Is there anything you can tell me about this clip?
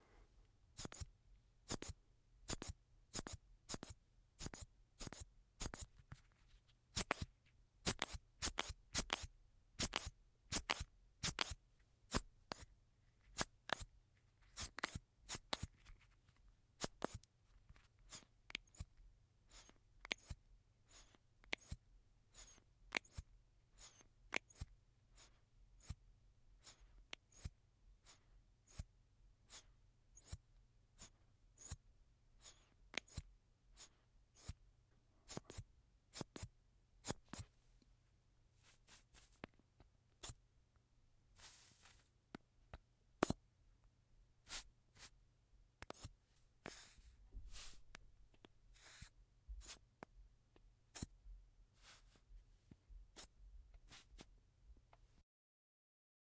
pipette pump bag valve mask imbu breath help-glued
Pump bag... using an ear pipette.